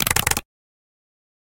TYPING-FLOURISH-SHORT
Typing quickly on a mechanical keyboard.